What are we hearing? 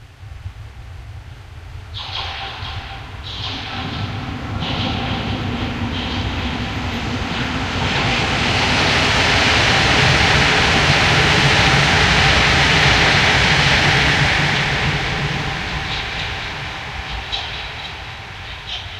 U3 near ruin echo reverse boncing ball
The same local Train going over some Poins at a moderate speed. Edited using "Audacity", with echo effect
Noisy, Tracks